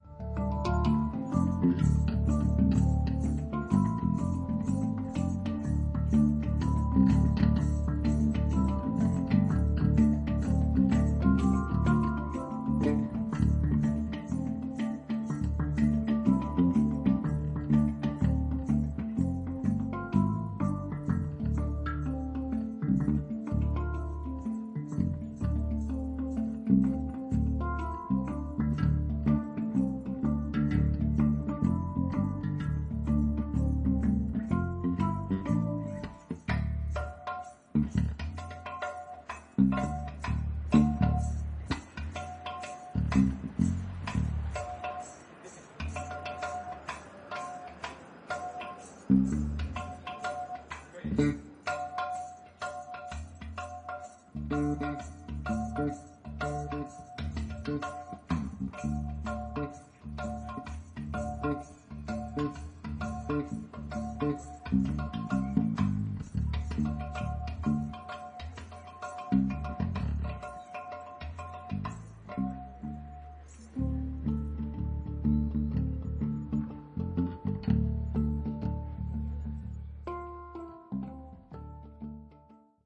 hang drum 310513 002
Festival, hang-drum, instrument, Poland